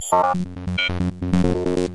These parts were from Premonition which was on the Directors Cut LP back in 2003.
electronic, gltich